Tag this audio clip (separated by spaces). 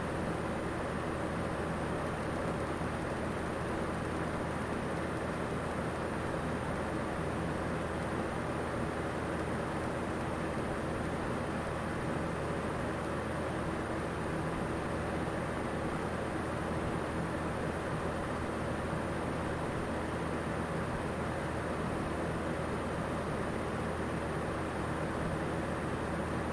air-conditioning ambiance ambience ambient atmosphere background buzz drone fan hum machine noise vent